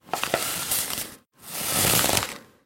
enrollar y desenrollar papel
roll, paper